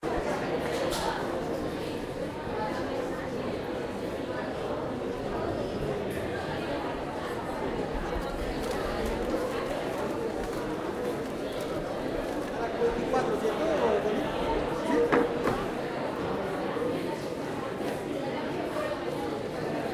Crowded shopping mall
Recorded with Zoom H1